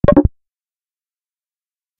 Scrolling Advancing Sound
UI sound effect. On an ongoing basis more will be added here
And I'll batch upload here every so often.
Advancing, Scrolling